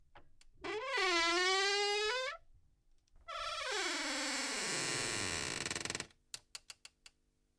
Squeaky Door Hinge
A very squeaky door being open and closed in a recording booth, kind of spooky sounding
Recorded with the nearest mic (a dynamic Shure microphone, model unknown) with some noise removal applied afterwards.